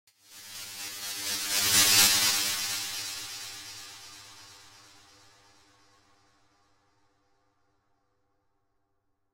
metallic whee effect
Filtered, phased and sliced noise effect. Programmed for a progressive trance production.
electronic, sound-effect, metallic